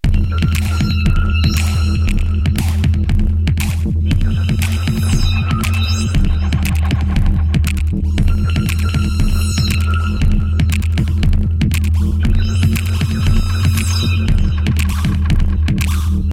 this track is dedicated to the jungle, tribal beatz enthusiasts